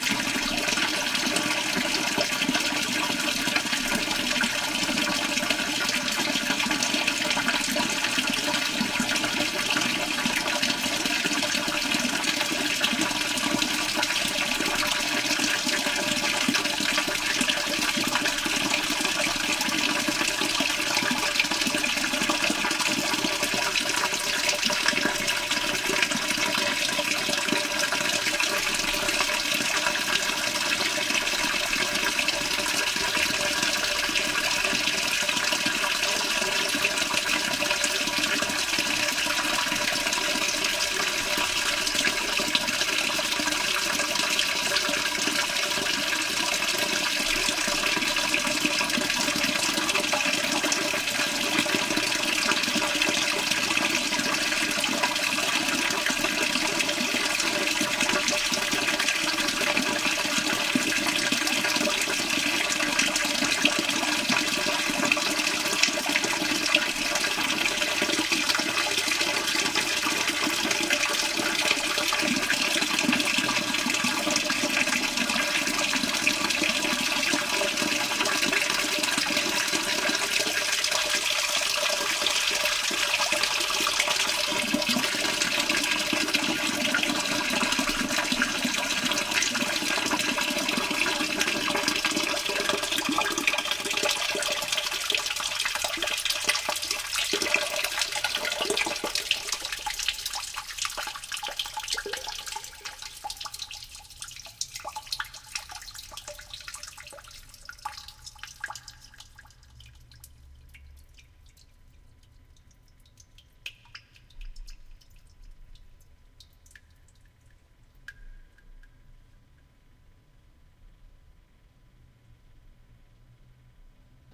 Agua cae en Tanque
Folie de Agua cayendo en un tanque de concreto